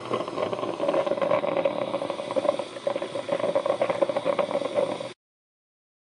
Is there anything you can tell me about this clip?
turn on the coffee maker
coffee, cafetera, maker